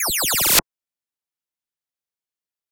raygun short 2
raygun very short